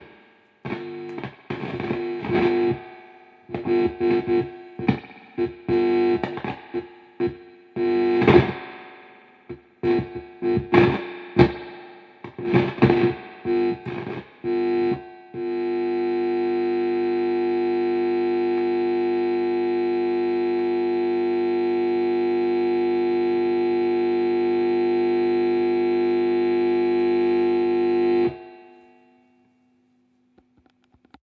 Buzz guitare électrique ampli
Buzz, guitar, electric